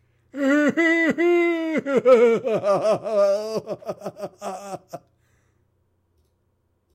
Dumb male laugh
Dumb, laugh, male